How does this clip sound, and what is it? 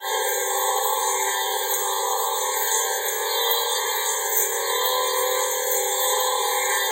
This sound is composed entirely from the high-frequency harmonics (12-20 kHz) from a field recording, transposed downward and layered many times. The sound contains loop points and will loop seamlessly in programs that read such meta-data.
brittle, experimental, glass, glass-like, harmonics, light, loop, looped, synthetic-atmospheres